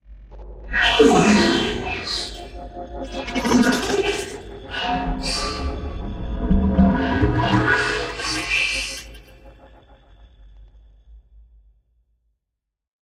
Creative Sounddesigns and Soundscapes made of my own Samples.
Sounds were manipulated and combined in very different ways.
Enjoy :)
Alien, Artificial, Creepy, Lifeform, Scary, Sci-Fi, Sound-Effect, Space, Spaceship, Transmission, Voice